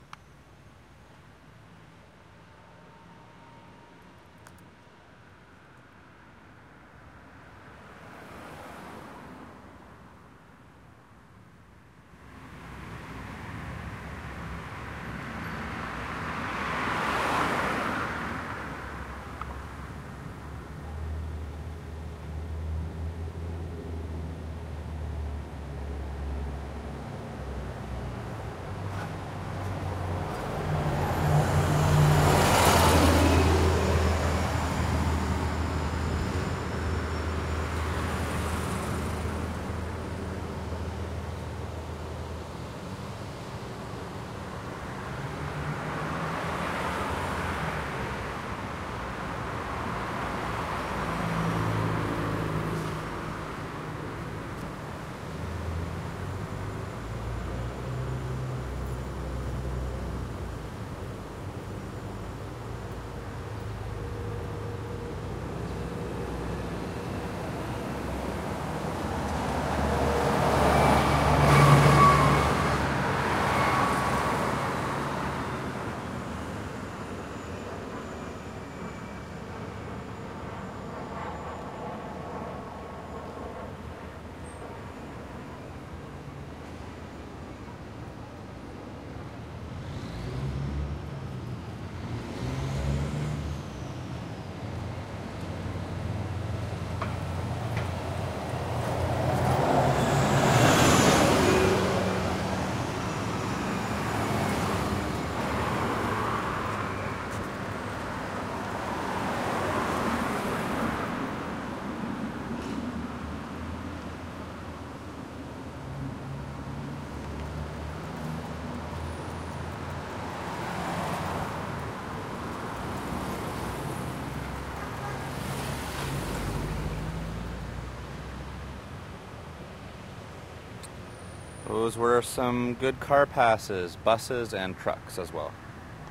Car passes Medium
recorded on a Sony PCM D50